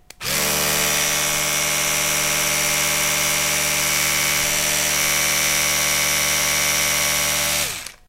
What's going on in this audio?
Dare12 3 electric toothbrush
My electric toothbrush.